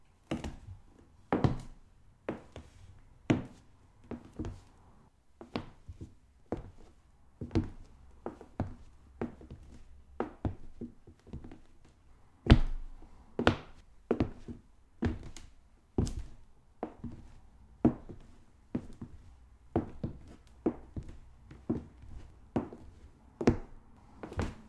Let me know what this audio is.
The sound of boots on wood.
Wood, Boot, Footsteps